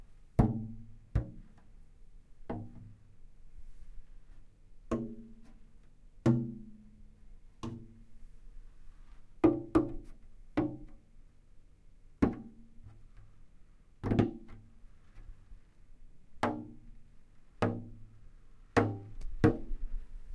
Drumming water jug
Drumming on a nearly empty water jug.